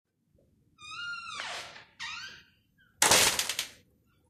creaky wood door close
Creaky wooden door closing.